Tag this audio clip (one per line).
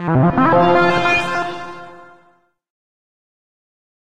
effect
game
jingle
space